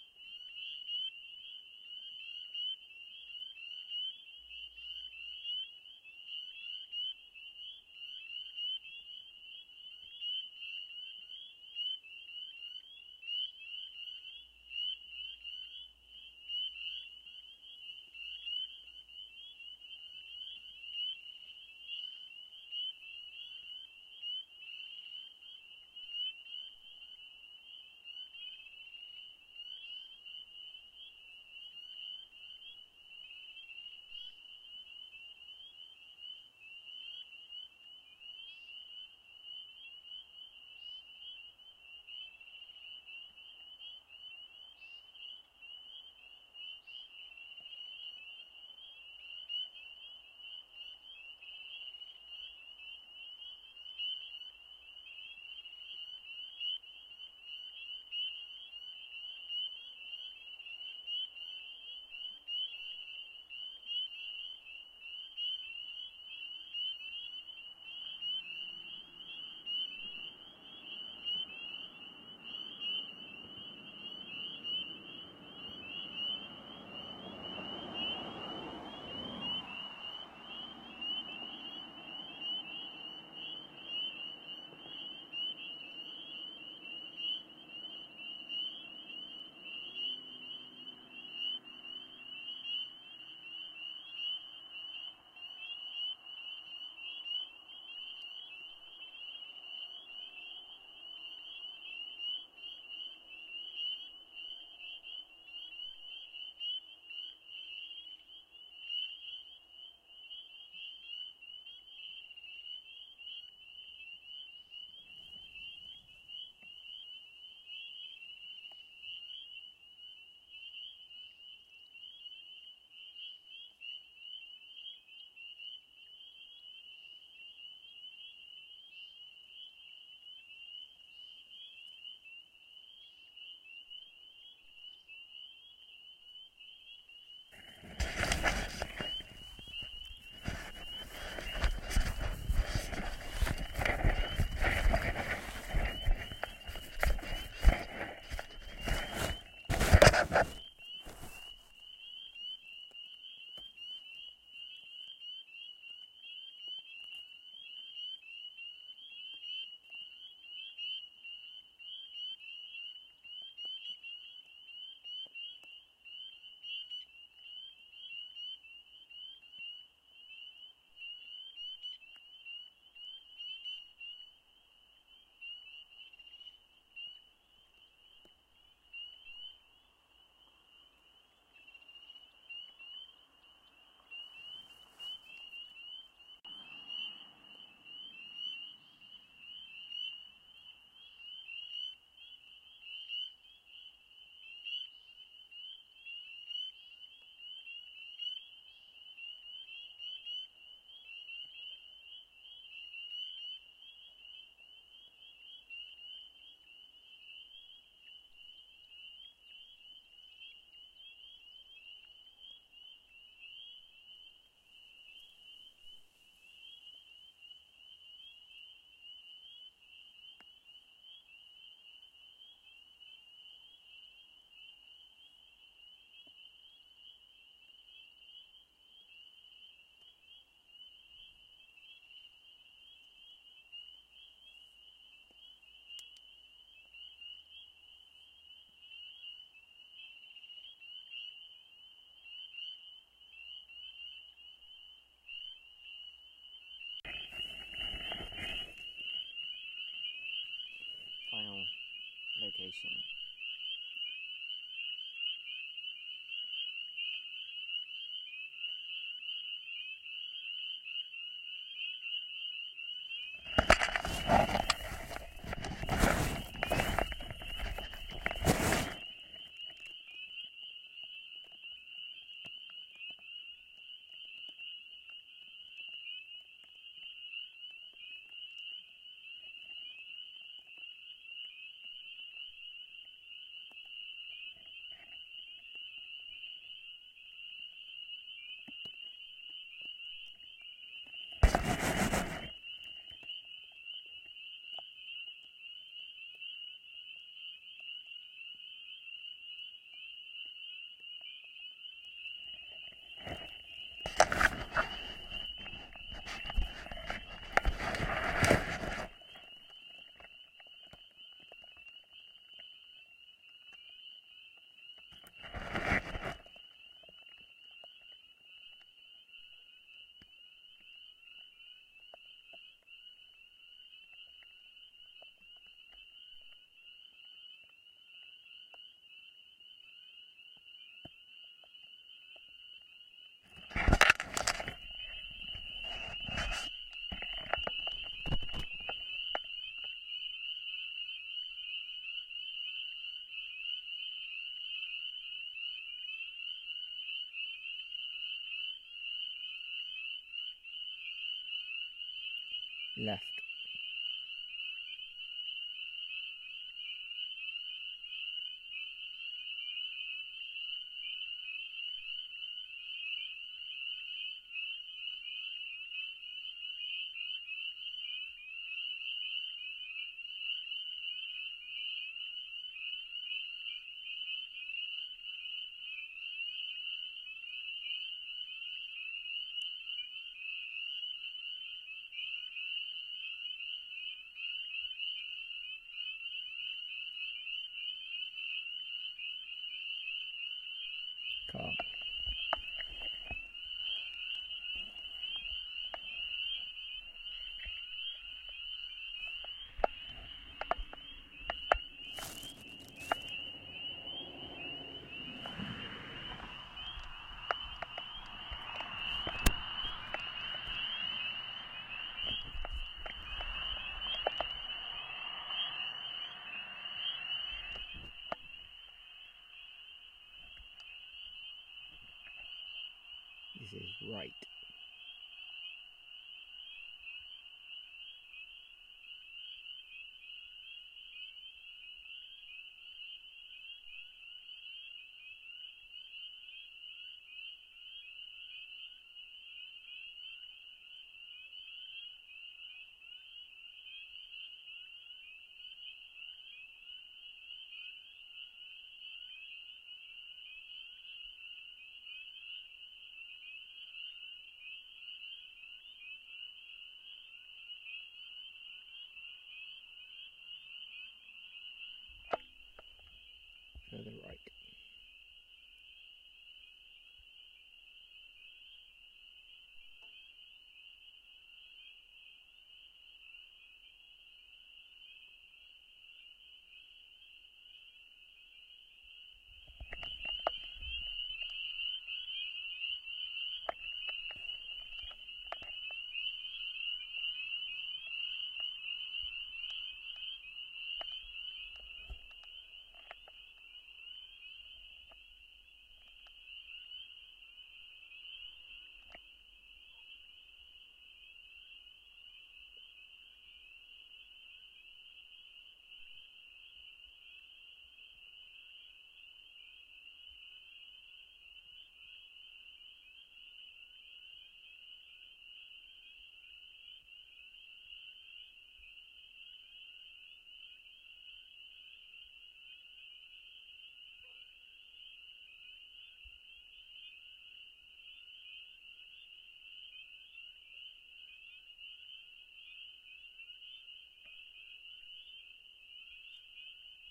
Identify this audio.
WILDTRACK Swamp at night in Minnesota USA
Frogs calling in a swamp at night in Minnesota, USA next to a river; some cars drive past. Recorded on a Sennheiser Microphone.
atmos, calls, car, drive-past, driving, field-recording, frog, icuttv, marshes, marshland, nature, night, nighttime, nocturnal, river, riverine, road-noise, swamp, truck, wetland, wildlife, wildtrack